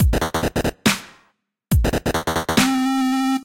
A dubstep loop made with a Minimal Kit.